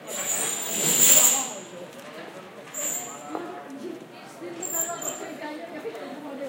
shop.shutter
shop shutter being closed and screeching + voices /persiana de una tienda que chirria al cerrarse + voces